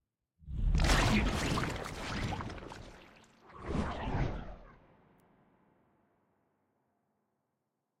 titleflight-paint-splat-spill
Used for an animated title open, sounds liquidy, like paint.